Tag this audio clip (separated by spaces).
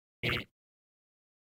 Buzz error machine Negative